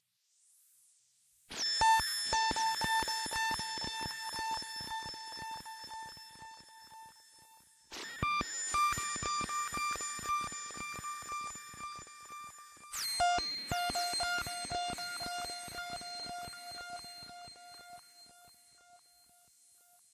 vintage radio type sounds
This is a recording of a synthesized sound that has a vintage radio feel. These are three separates sounds with a small pause between them. Synthesized using a free vst synthesizer.